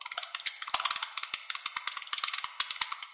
Ant sound effect by making noises into the mic, then EQ and reverb